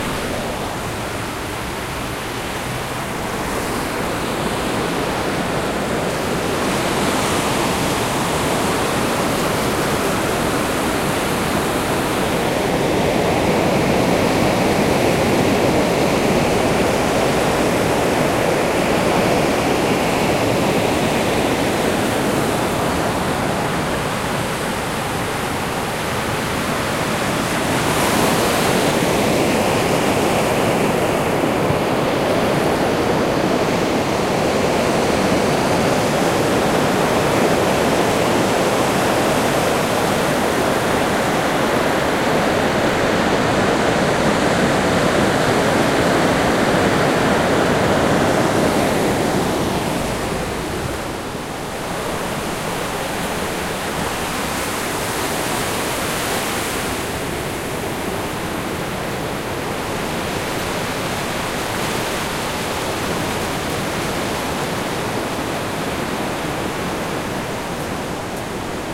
Mar desde adentro de una piedra hueca.
Sea from inside a hollow rock.